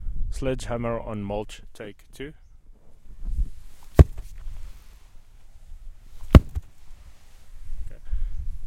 180081 Sledge Hammer on Mulch 02
A sledge hammer slamming the ground
field-recording, fx, noise, Sledge-Hammer